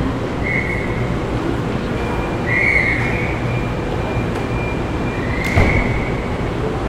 Train Whistle
This is a recording I made of the train conductor blowing his whistle. Made with a Rode NT4 hooked upto a Zoom H4n
Wynyard, Whistle, Conductor, Train, Station